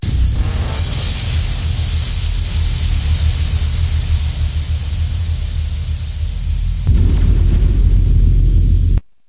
Deep Atmospheric Wave Crash
Sounds like a character is getting blasted backwards by some gnarly force !
Crash, Cinematic, Noise, Atmospheric